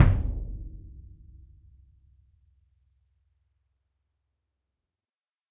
Symphonic Concert Bass Drum Vel34
Ludwig 40'' x 18'' suspended concert bass drum, recorded via overhead mics in multiple velocities.
orchestral, bass